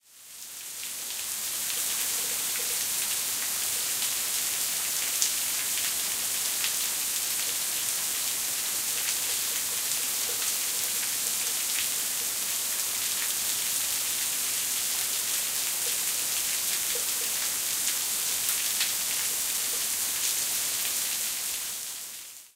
Close Waterfall 3
Field recording of a waterfall recorded from close by.
Recorded in Springbrook National Park, Queensland using the Zoom H6 Mid-side module.
forest, water, creak, river, flow, stream, field-recording, nature, waterfall